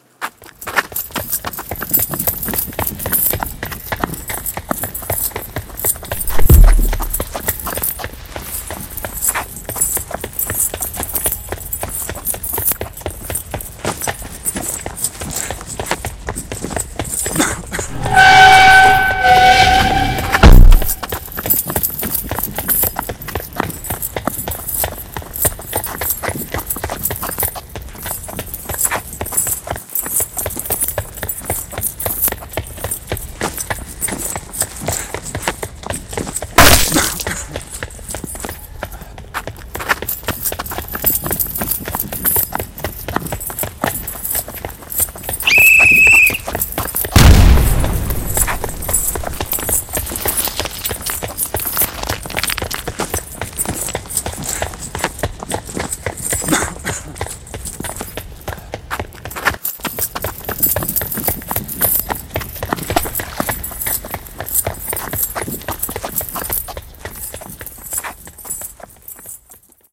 Crazy Run
This is a sequence I created for my podcast. It is a male running through a crazy maze. Sounds used are breathing, running, chains, whistle, explosion, fall and a spat. I have a version with train noise in the background.
running, crazy-run, human-male-running, fast-running